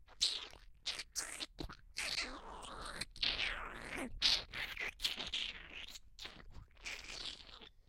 Creature Wet Creepy 01
I made sucking, mushy sounds with my mouth. This is meant to sound creepy/gross/disgusting.